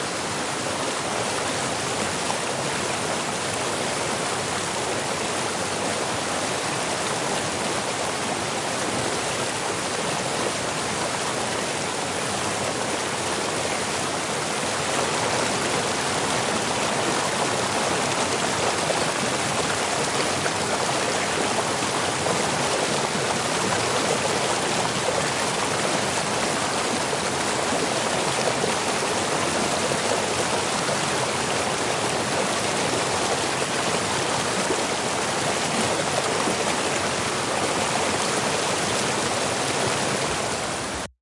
180925 002 waterfall close vortex
Waterfall close perspective